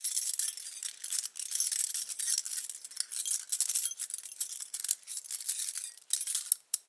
Metal Jingling Slightly Noisey 2
jingling, metal, metallic, textural